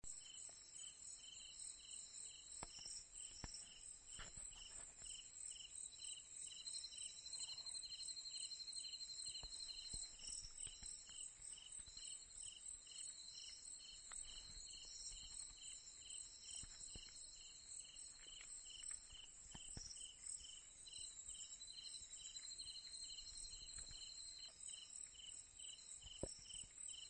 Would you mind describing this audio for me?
Night Ambience withcricket1
Recorded at Koura village - Mali
01, ambience, Night, withcricket